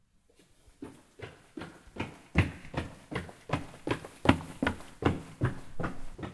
running in boots
boots, running, running-in-boots